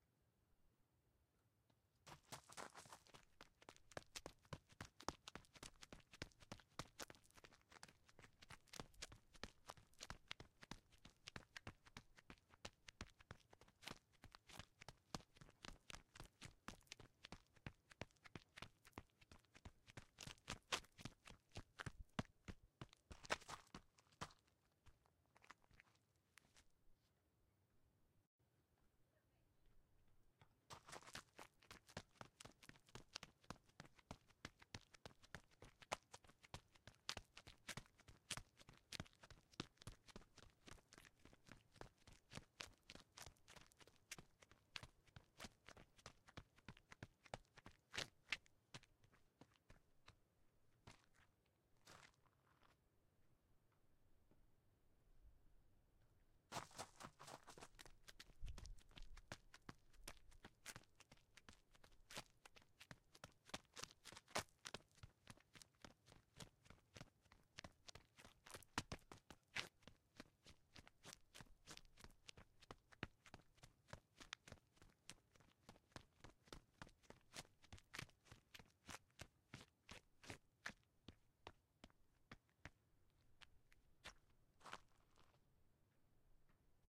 Running man on pavement
A man running on pavement and some loose gravel. Slows down towards the end. Several versions.
SM57 into Focusrite Scarlett
footsteps, gravel, human, male, man, pavement, running